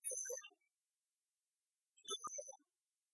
crow's voice (mimicry)
mimicry, bird, crow